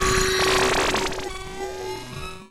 Patching with eurorack analog modular synth. No external effect or computers used here.
analog, glitch, mayhem, modular, noise, sfx, synth